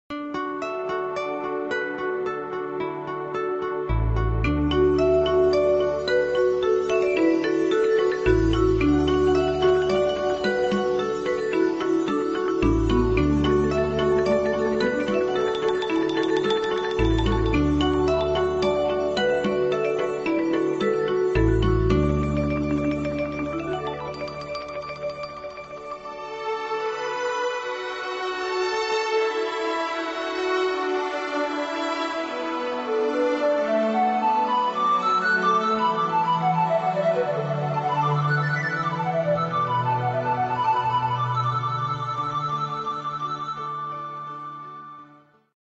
ดนตรีอินโทร-บรรเลง
music intro function